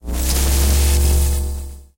SpaceEngine Start 01
An electric space engine starting sound to be used in sci-fi games, or similar futuristic sounding games. Useful for powering up a space engine, or some other complex device.
videogames, indiedev, futuristic, game, ambient, electronic, engine, sfx, gamedev, spacecraft, video-game, gaming, sci-fi, games, space, electric, gamedeveloping, indiegamedev